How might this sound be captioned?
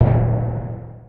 A nice timpani made from scratch in a synth in reason.
drum, sweet, epic, timpani, nice, Awesome, tom